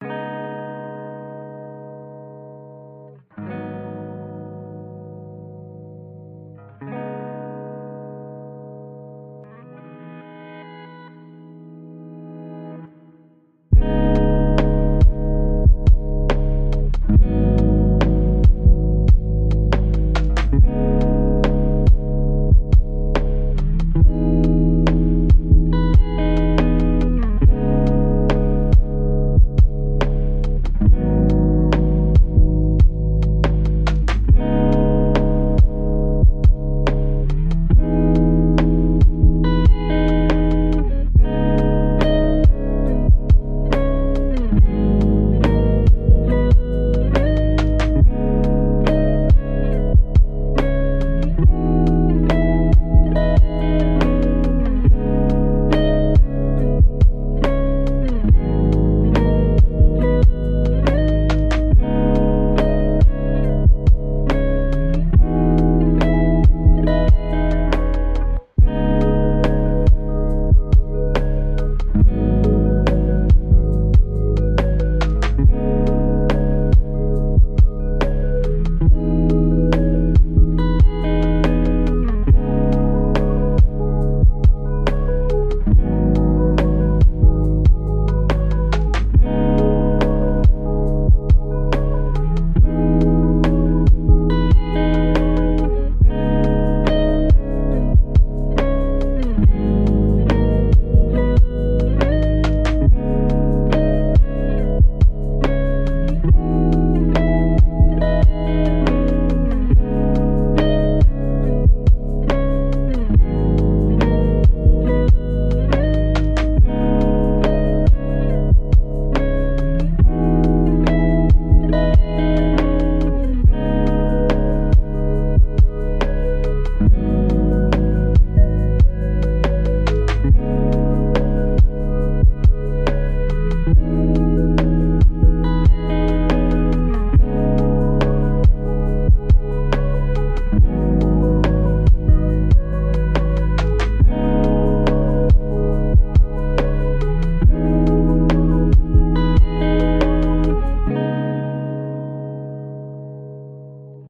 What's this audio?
Glory Hotel (fixed track 55)
Track: 55/100
Genre: Lo-Fi
Fixed track.